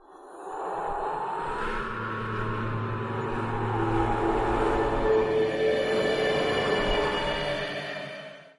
Wind and Ghost
Another sound I made for my DnD Games. This time I thought about a lonesome tower which is inhabited by ghosts.
I made it by cutting and mixing these 3 sounds together:
Have fun with it ❤️
creepy, dragons, evil, nightmare, eerie, suspense, DnD, haunted, ambient, spooky, fantasy, sinister, ghosts, ghost, dungeons, wind, doom, tower, scary, horror, game, spectre, phantom, fear